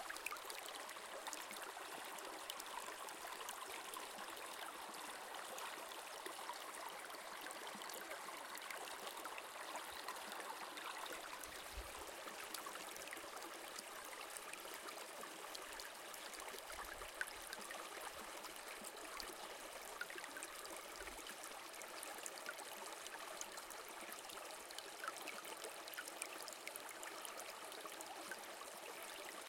slow water
slow flowing water recording with Zoom H4n